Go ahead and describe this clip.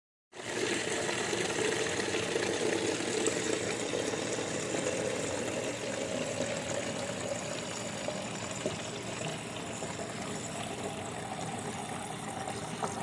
Water Bottle Filling
Moderate pressure stream from a fridge filling a water bottle.
bottle, hydrate, water